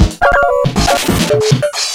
KTC loop-01
very weird notes coming from my casio keyboard...
glitch, abstract, circuit-bent, loop, brokebeat, idm